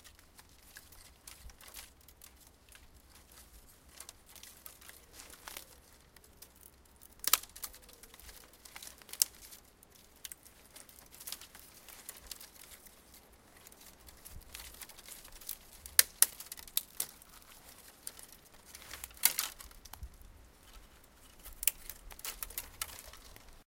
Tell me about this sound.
Branch snaps
Branches snapping and cracking